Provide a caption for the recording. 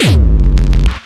GNP Bass Drum - Bad Loop
A kick drum ran through a Digitech guitar multi-fx unit, with a loopy tail.
bass-drum, distortion, gabber, gnp, hardcore, kick, kick-drum, single-hit